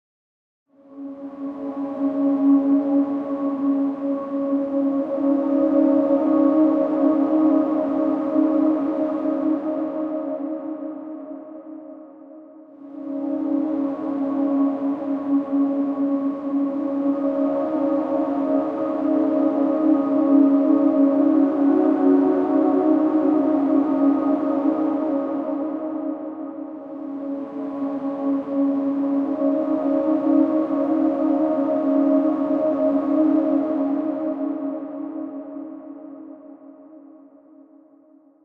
Synthetic Pad that works well for dungeon or horror crawlers